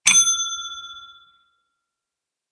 Ding sound of a pet training bell.
Recording device: Blue Yeti